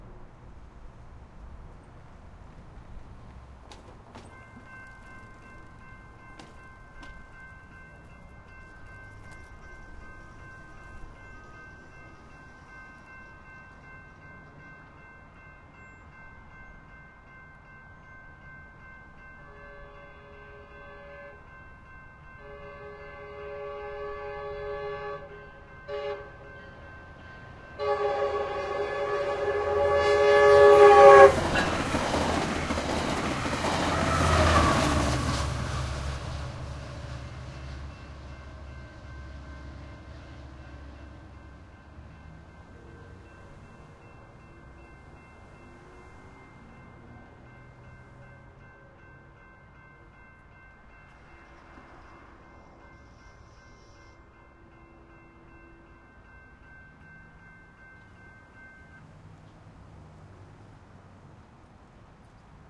Train Passing Grade Crossing
A Metrolink train passing a grade crossing in Glendale at full speed. First, you hear the crossing gates lower and the bell start to sound. Then you hear the train pass. Field recording 11/15/2014 using a Sony PCM-D50 with internal microphone and wind screen.
Whistle, Metrolink